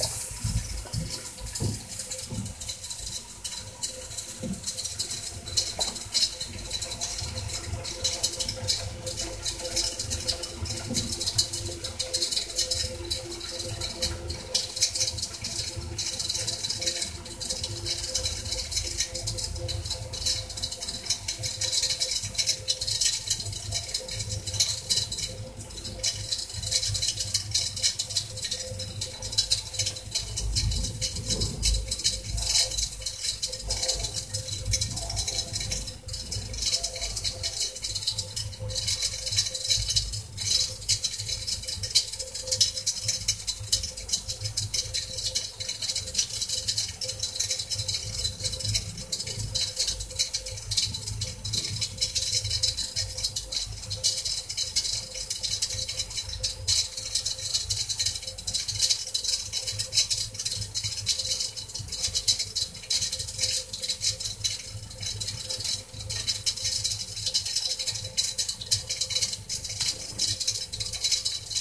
Gas Water Boiler
Recording of a relatively loud domestic gas boiler. The time clock is audible and clicks regularly. Recorded on a Wileyfox Storm phone.
ticking, bubbling, rumble, creak